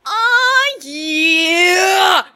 aw yeeeeeeeAH!
WARNING: might be loud
another vocalization of triumph over winning